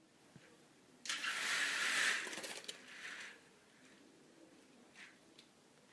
Audio de cortinas abriendo
Sound, Curtain, Open